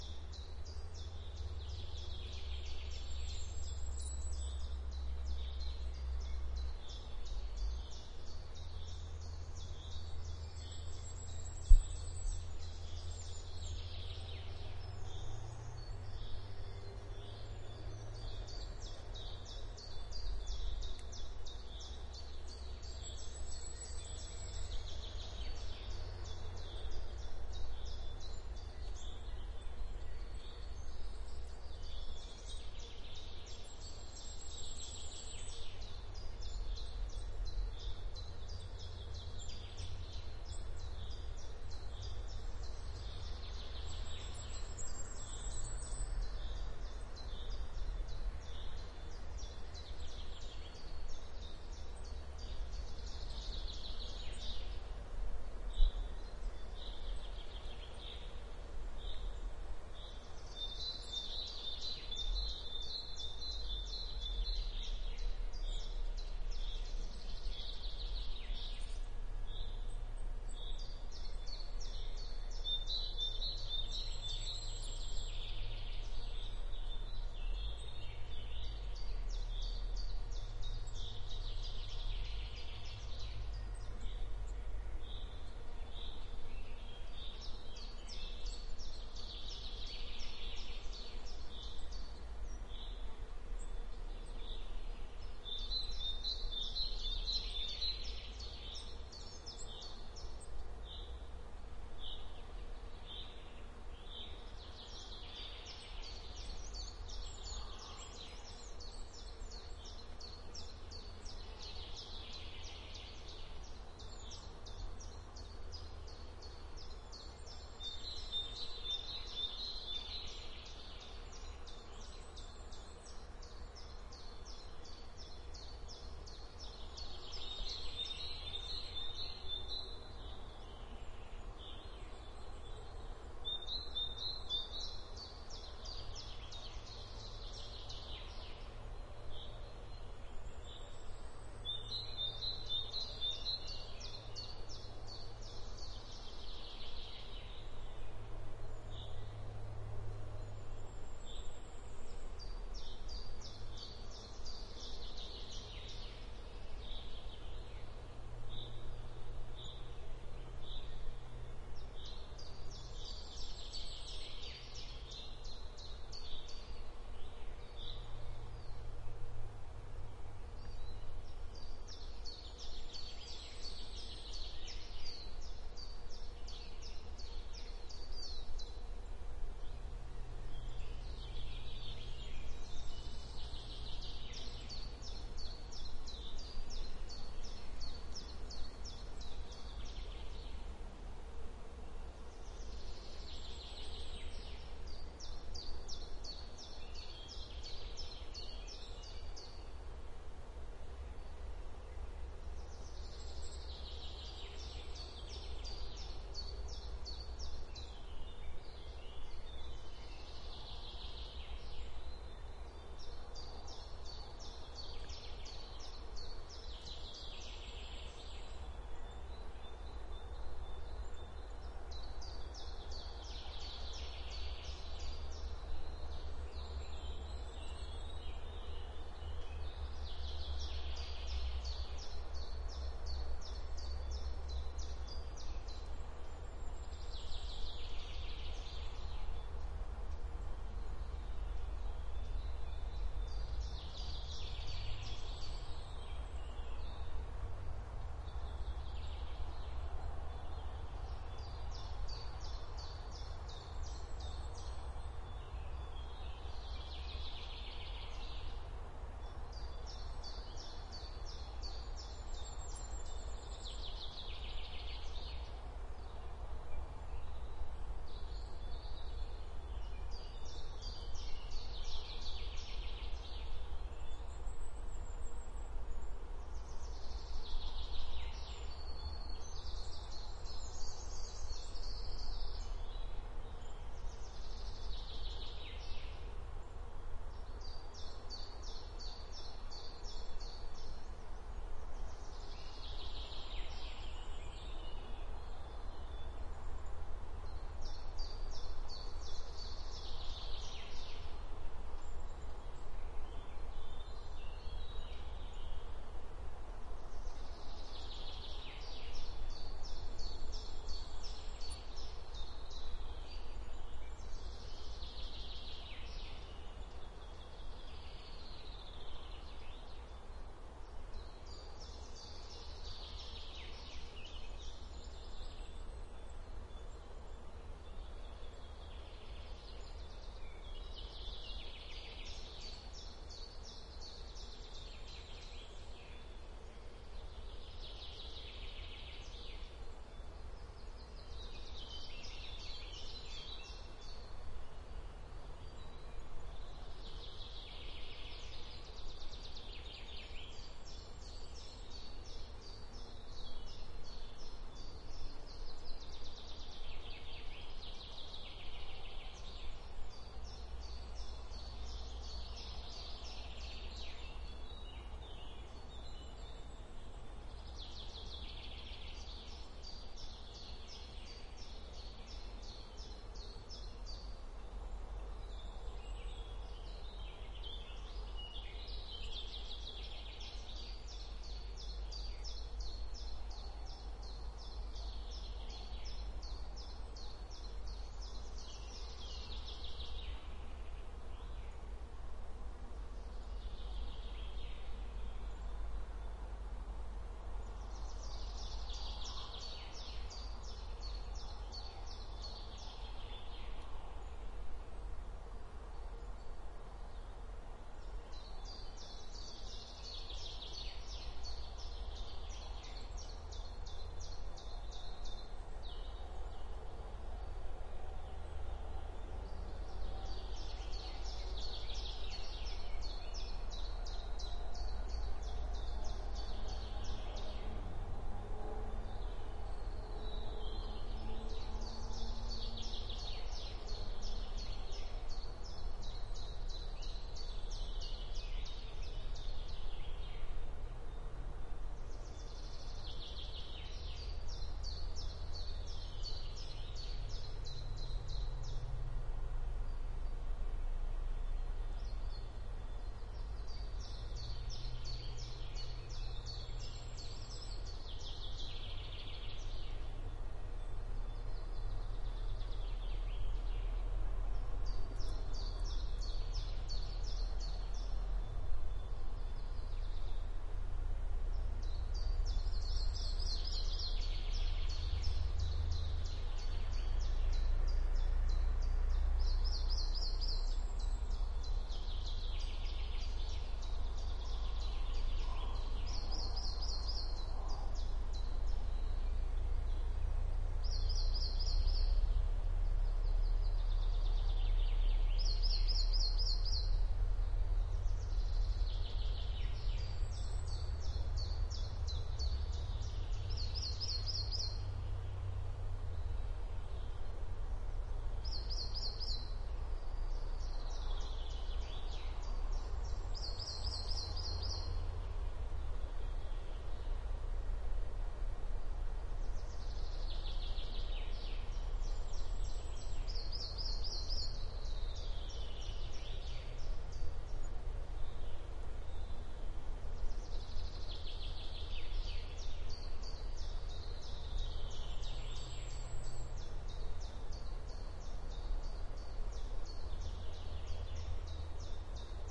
Spring Forest Midmorning
Recorded on a May morning in the Harzmountains. Not as "traffic-free" as it should be. Typical sound for the location and the time of year. AT-3032 microphones, FP-24 preamp into R-09HR.